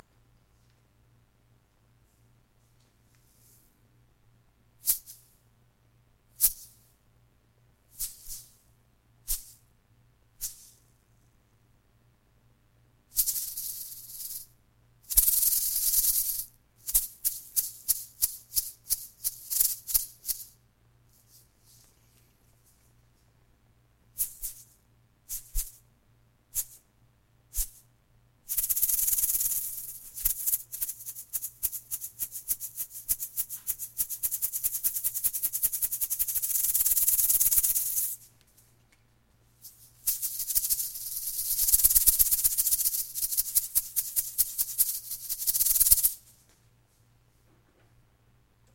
Two different seeded poppy pods shaken at various rates. Single hits and loops and a few sweet rattle snake segments that you have to edit yourself.
loop, shaker, snake, rattle, hits